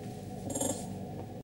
Звук приликом померанја на столици. sound where you moving on the chair.